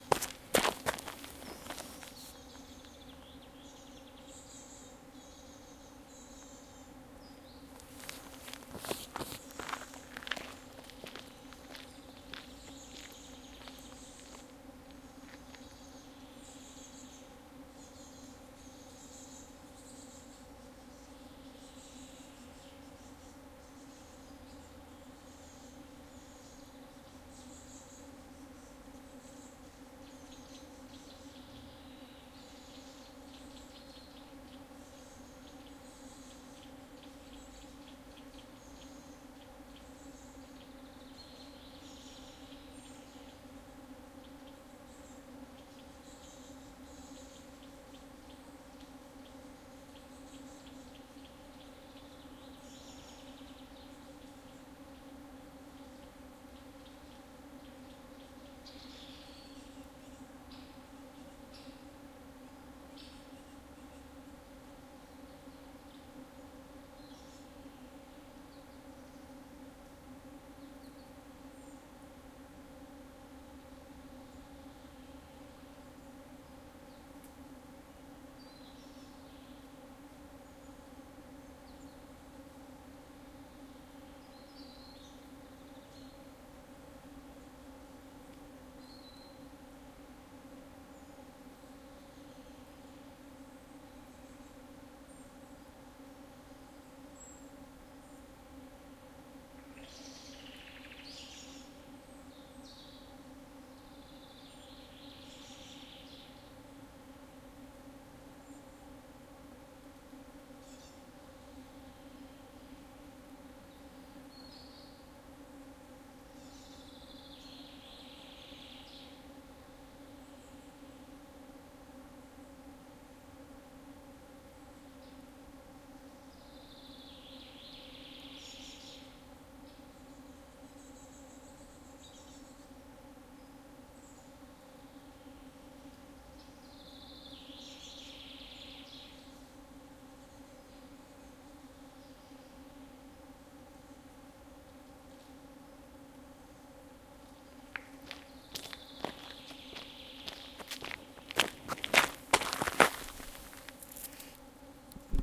forest insects bees birds spring 1 XY
Unprocessed field recording of a continental forest in May. Birds and lots of insects.
birds, field-recording, forest, insects, nature